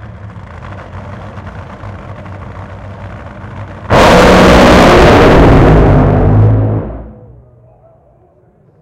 Recorded using a Sony PCM-D50 at Santa Pod raceway in the UK.